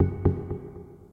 A collection of 27 samples from various sound sources. My contribution to the Omni sound installation for children at the Happy New Ears festival for New Music 2008 in Kortrijk, Belgium.